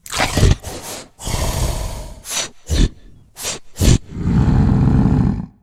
A monster voice sound to be used in horror games. Useful for all kind of medium sized monsters and other evil creatures.
fear, horror, video-game, scary, games, voice, rpg, epic, gamedev, indiegamedev, frightful, videogames, terrifying, frightening, game, gamedeveloping, monster, gaming, indiedev, sfx, fantasy